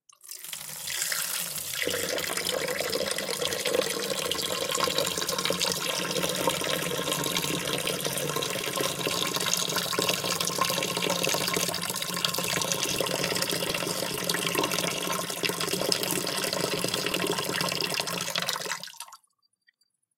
pouring water 2
Once again slowly pouring water from a cup into a bowl in a sink that was already full of water. Poured from a few feet above the bowl.
Recorded with a Sony IC recorder, cleaned up using Edison in Fl Studio.
water, pouring, pour, sony-ic-recorder, liquid